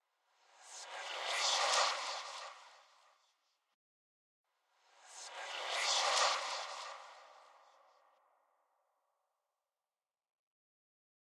Whisper whoosh effect. My voice was recorded, then processed. Enjoy it. If it does not bother you, share links to your work where this sound was used.
Note: audio quality is always better when downloaded.